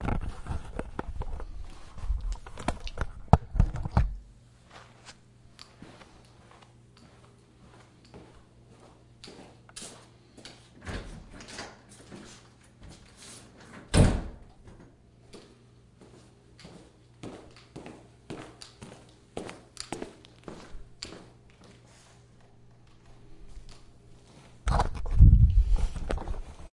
Footsteps wearing sneakers on tile. Moves from right to left.
footsteps, sneakers, tile